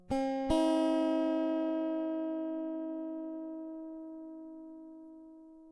Samples of a (de)tuned guitar project.
acoustic, guitar, oneshot